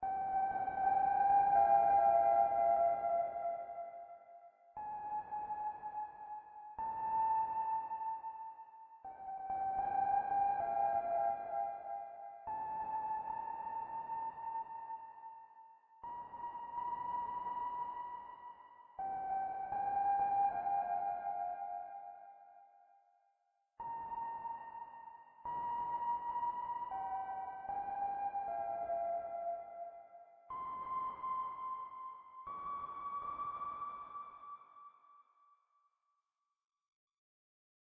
A weird, lonely sound, made in Logic Pro X with a nice soft pad from Fabfilter.
I've added a gentle touch of reverb and the subtlest of an echo, barely perceptible.
It's all yours to use in your projects.